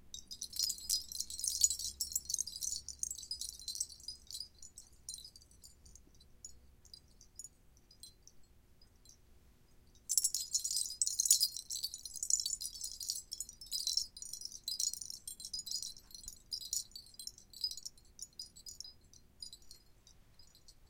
jingling braids
small jingling metal on braids.
chimes, metal, tin